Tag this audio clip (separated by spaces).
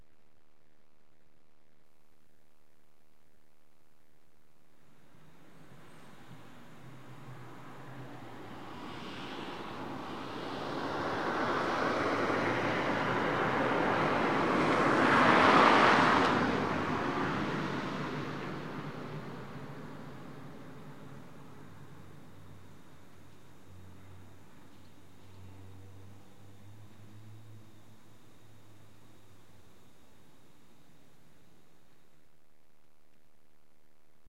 field-recording car transport